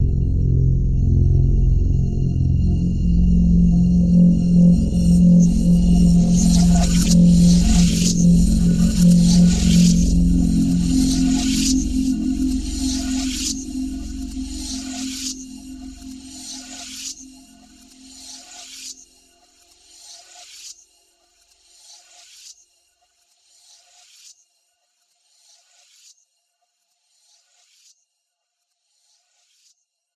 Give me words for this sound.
A very dark and brooding multi-sampled synth pad. Evolving and spacey. Each file is named with the root note you should use in a sampler.
dark, multi-sample, ambient, synth, multisample, granular